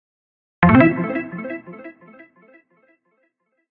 A success sound made by FLStudio with a little reverb. Can be used for a computer sound when you do something right or ortherstuff like that.
beep, beeping, computer, digital, electronic, glitch, Success